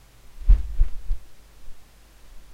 Some fight sounds I made...
fight, fist, punch, kick, hit, fighting, leg, combat